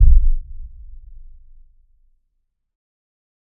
Thud 1 withLowLongReverb
See description of Thud_1_Dry -- this just has low-level long-lasting reverbs added to it.
A thud is an impulsive but very short low frequency sweep downward, so short that you cannot discern the sweep itself. I have several thuds in this pack, each sounding rather different and having a different duration and other characteristics. They come in a mono dry variation (very short), and in a variation with stereo reverb added. Each is completely synthetic for purity, created in Cool Edit Pro. These can be useful for sound sweetening in film, etc., or as the basis for a new kick-drum sample (no beater-noise).
synthetic, boom, kick, dark, cinematic, thud, low